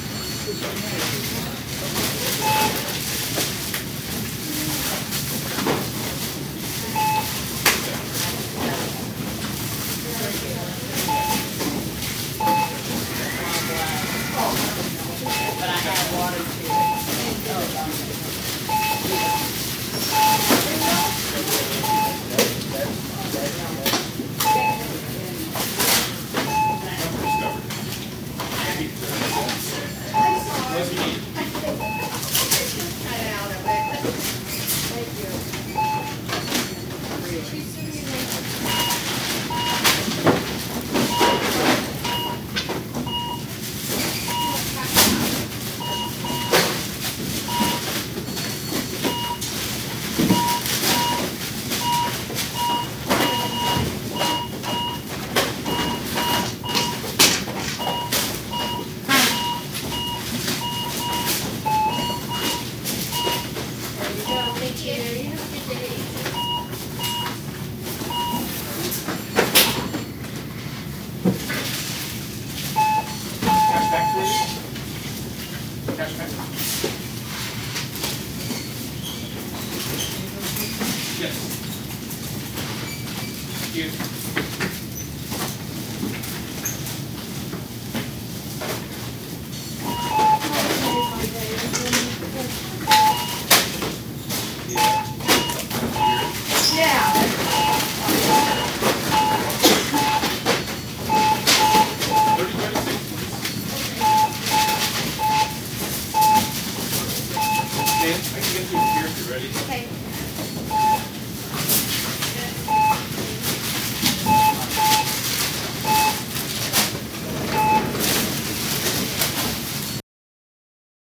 Bustling grocery store checkout
This is a mono recording of a busy checkout section of a supermarket here in the Midwest.
store, busy, supermarket, checkout, grocery, din, shop, cash, register, shopping, cash-register